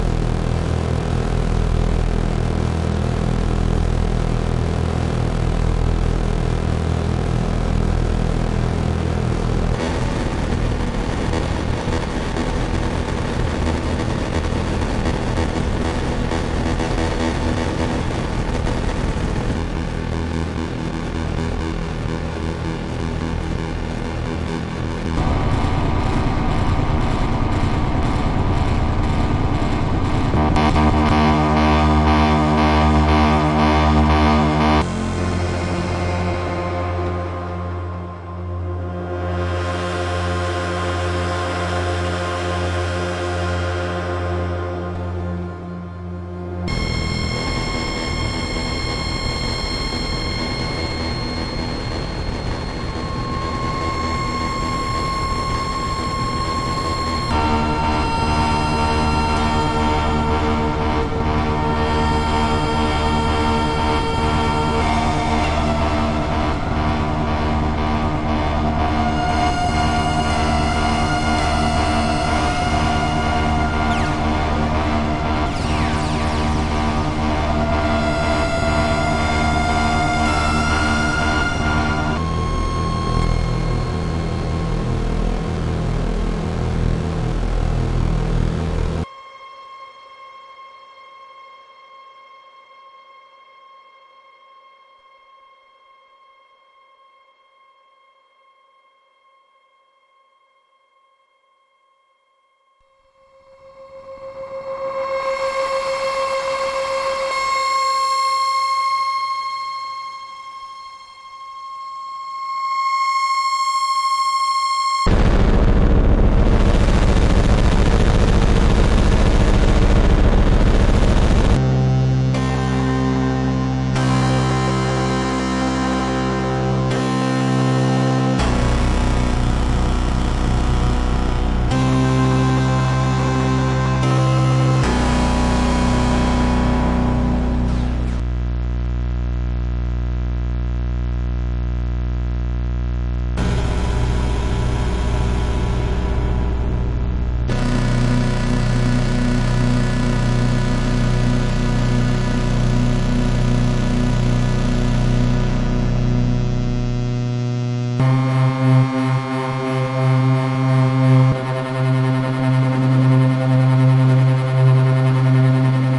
Mysteron Drone by Peng Punker
dark Mysteron Drone recorded into the Morphagene